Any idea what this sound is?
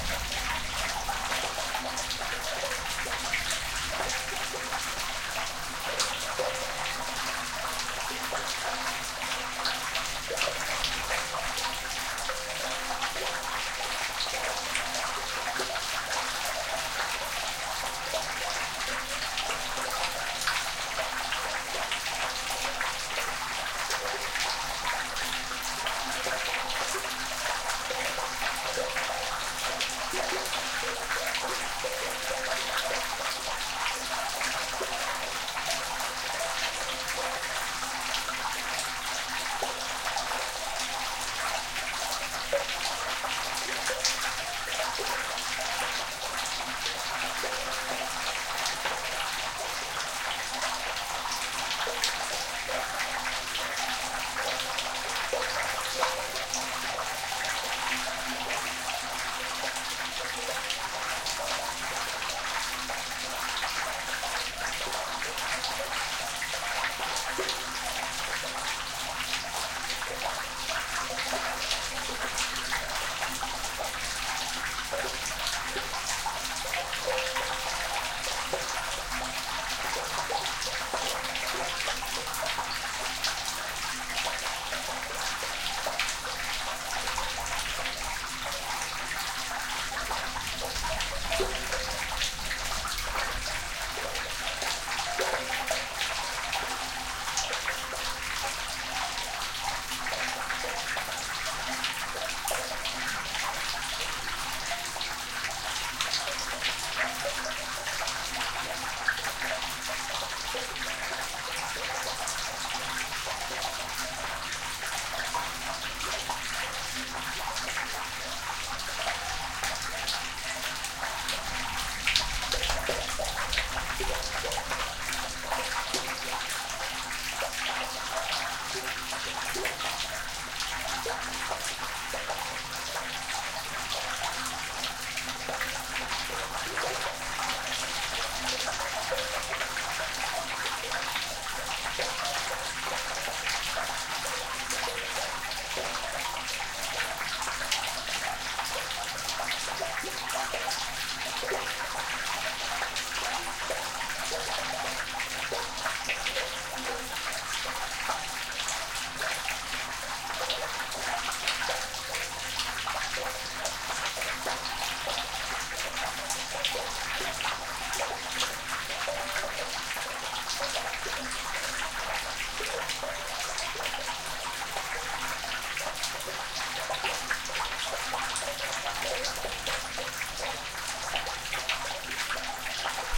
resonant sewer
A resonant water drain, just after rain.
drain, resonant